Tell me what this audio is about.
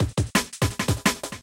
A misc Beat for whatever you want:) Check out some of the other cool beat in my "Misc Beat Pack"
Idrum, Beat, Misc